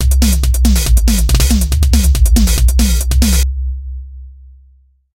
Twisted Feeling - Percussion 140 BPM
More than a feeling, a twisted feeling.
The drums, the percussion
140bpm
bass, 140bpm, drums, stabs, fast, loop, feeling, keys, percussion